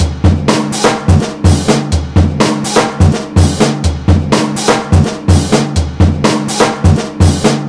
beat reconstruct with vst slicex (fl studio) and soundforge 7 for edition
beat, beats, bigbeat, break, breakbeat, breaks, drum, drum-loop, drumbeat, drumloop, drumloops, drums, loop, loops, snare